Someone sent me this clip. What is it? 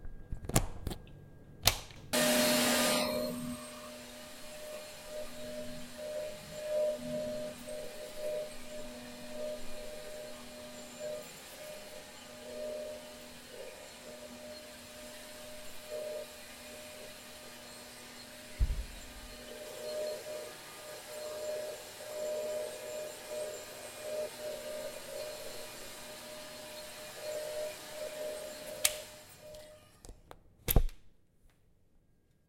Recorded when using dust collector